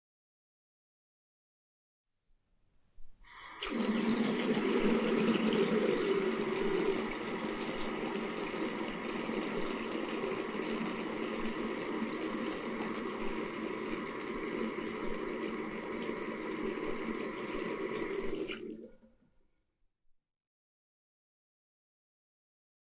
Tap water pouring